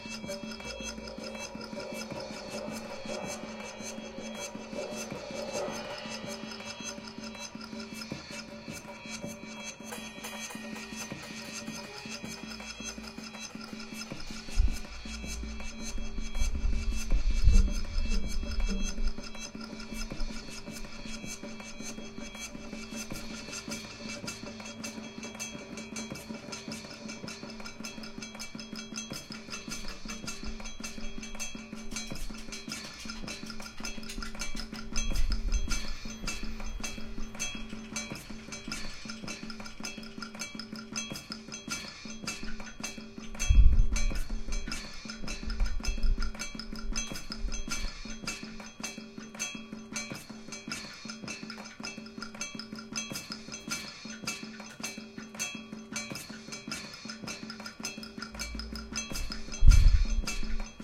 Mridangam in Electroacoustic music
Mridangam is an Indian drum used mainly in Carnatic music (Art music from south India). This is an excerpt recorded from a rehearsal session of an electroacoustic orchestra.
mridangam, drum, geo-ip, percussion, electro-acoustic